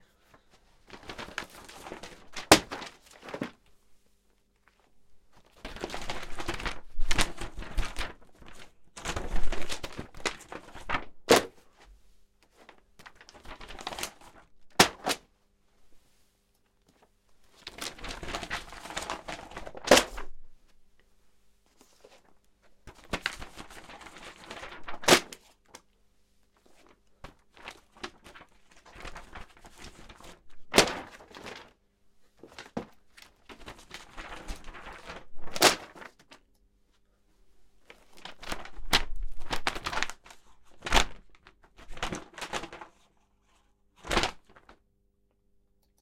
Paper thrown around in the air
This sound I recorded by physically throwing paper up in the air , but right in front of the microphone. I threw the paper seven or eight times. I added an EQ in order to create a sharper sound with paper being thrown. The sharp sound aids in the sound of the paper rubbing together as they fall down
sheets, paper-Throwing, Ruffling, paper-Paper